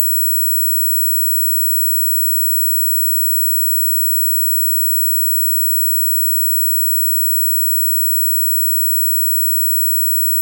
Ear Ring
An approximation of the sound of one's ears ringing. It combines multiple tones to create a more realistic effect. The sound was generated by a program I made specifically to create this sound.